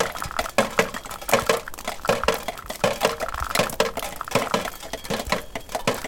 shedDrips2Loop
snow-melt on a shed roof, drips from gutter falling onto old rusting car parts. this is a section from shedDrips2 which forms an interesting rhythmic loop.
recorded at kyrkö mosse, an old car graveyard in the forest, near ryd, sweden.
drip, drips, loop, metal, rhythm, rhythmic, water